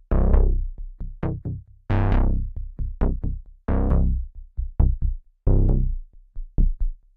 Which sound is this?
analog metallic bass to combining on your production.
Master Key: C
bass, clean, metallic